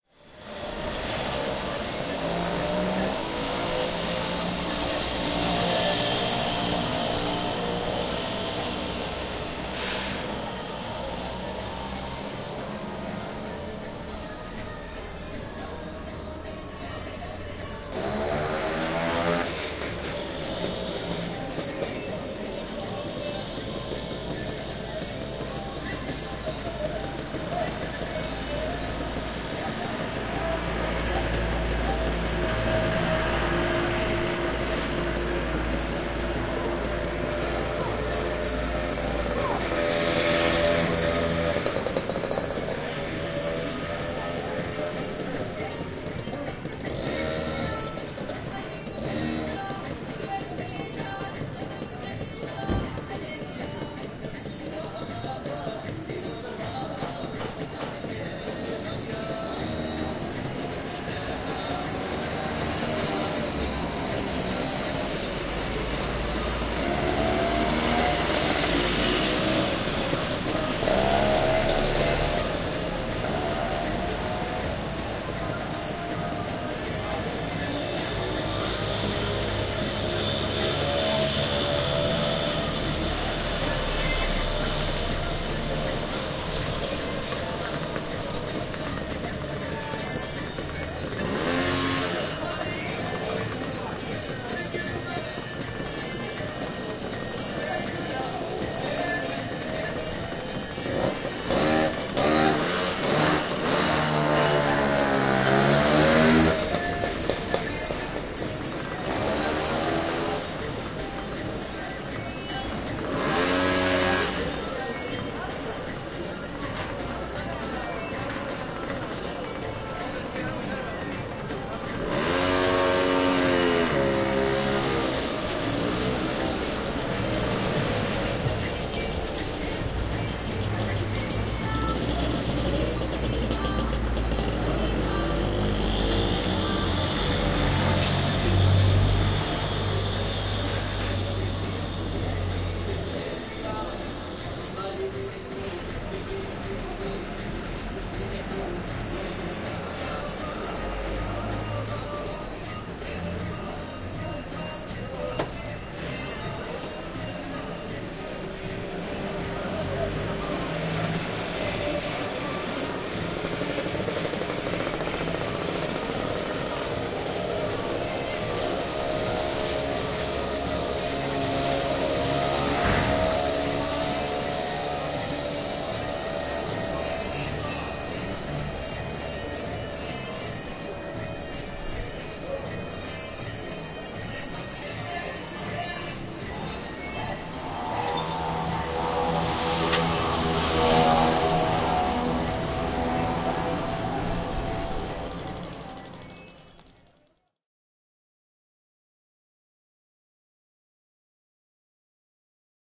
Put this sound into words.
Sound from Douz street